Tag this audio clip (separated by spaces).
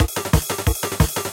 707 beat bend drum loop modified